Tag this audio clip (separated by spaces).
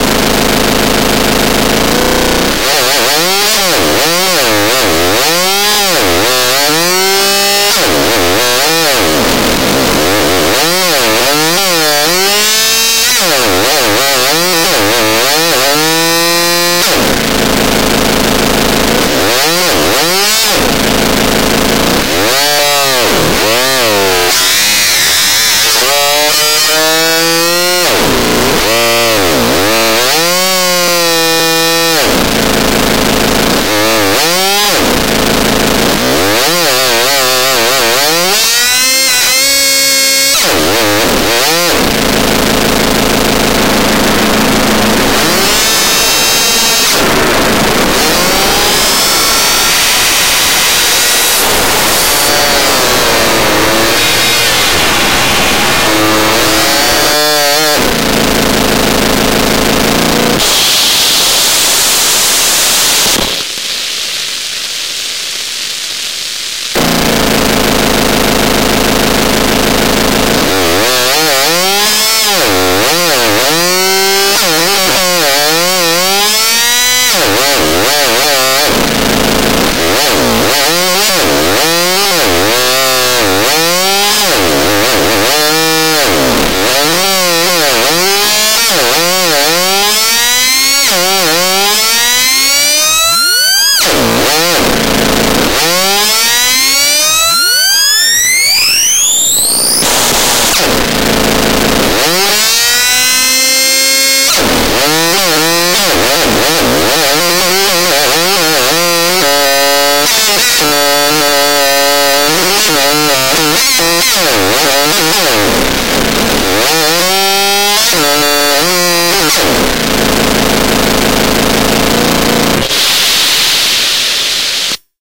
bent chainsaw harsh synth theremin